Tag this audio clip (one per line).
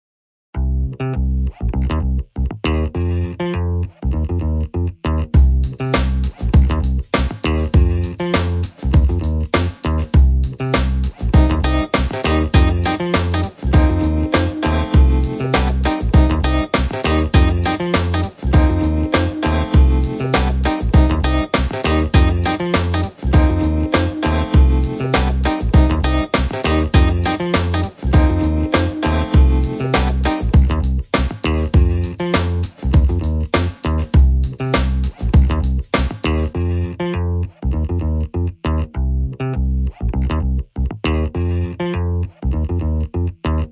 guitar-beat
groovy
guitar
loop
rhythm
beat